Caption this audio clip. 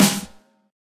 Snare 43 of the Zero Logic kit I made :DYou're not getting them all :
drum, logic, snare, zero